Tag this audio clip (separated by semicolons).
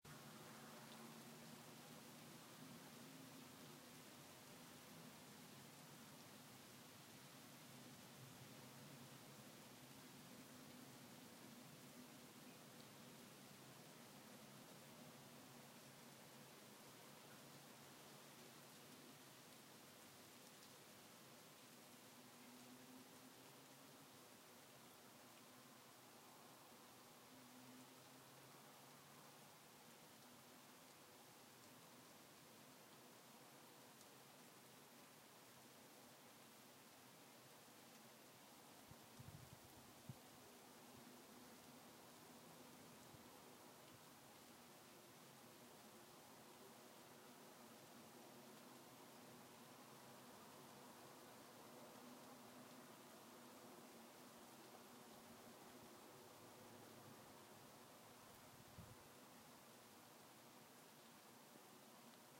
trees; wind; windy